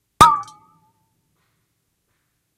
samples in this pack are "percussion"-hits i recorded in a free session, recorded with the built-in mic of the powerbook
boing, bottle, metal, noise, ping, pong, water